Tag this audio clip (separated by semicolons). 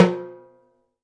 afro-cuban; percussion; salsa; sample; timbales